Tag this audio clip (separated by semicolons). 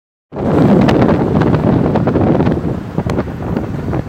blowing; wind; windy